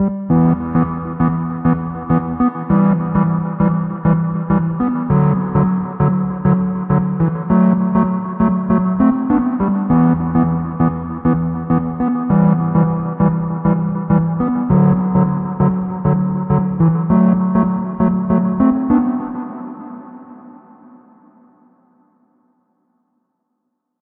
Polymer haze
a polyphonic synth melody created with u-he zebra 2